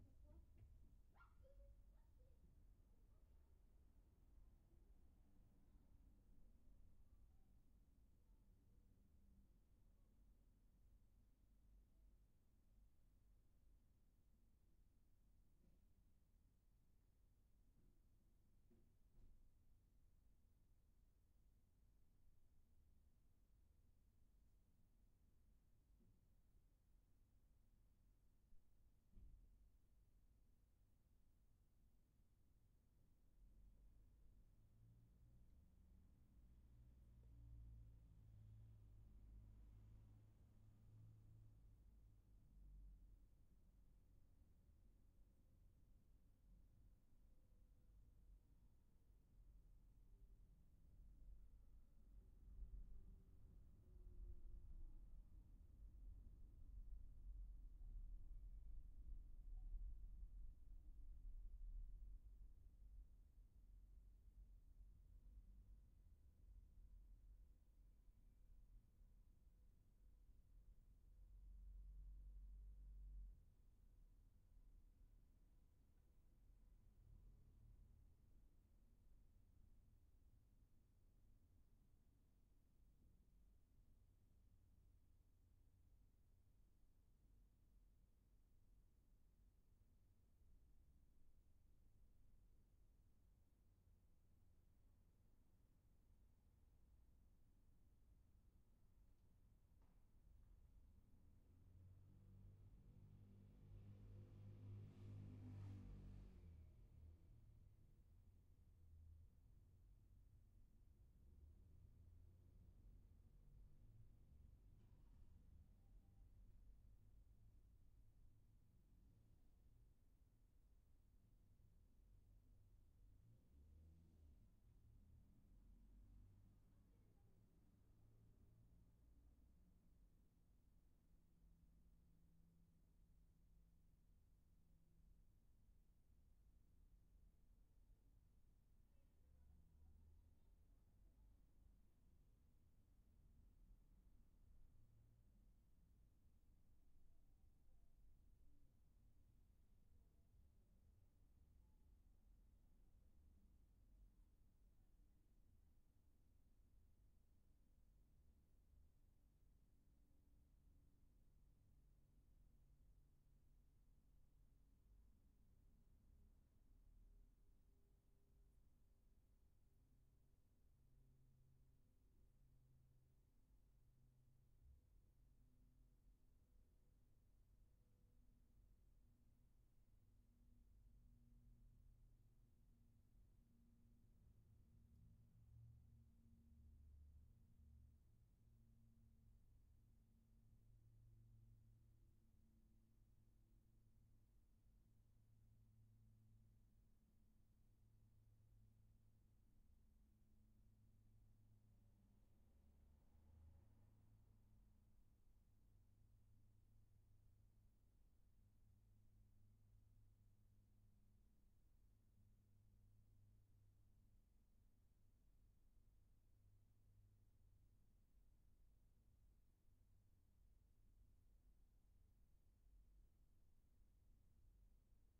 room tone street side room city animation
sound of my room near the window street side. A lot of animation in the street,
citizents, vehicles, car door,...
ambience, ambient, animation, background, car